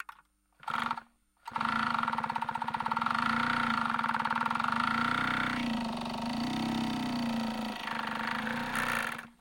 Queneau machine à coudre 27
son de machine à coudre
machinery, POWER, industrial, coudre, machine